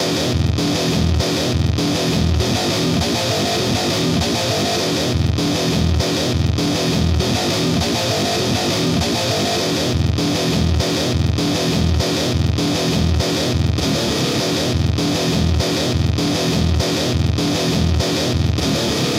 100 Grunge low gut 01
variety, blazin, distort, synth, gritar, guitar, crushed, bit